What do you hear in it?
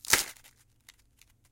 Ice tray break
The sound of an ice-cube tray full of ice-cubes being twisted.
twist, ice, clink, crunch, click, glass, drink